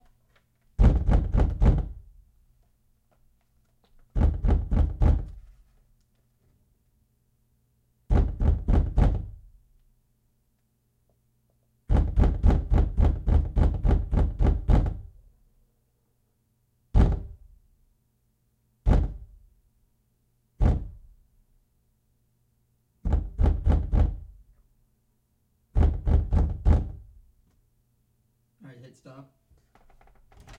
door pounding
door, sounds